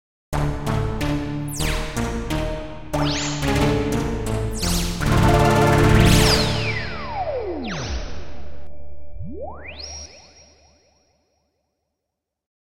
A game over or Boss type tag, 2 part synth with fading end
fantasy, digital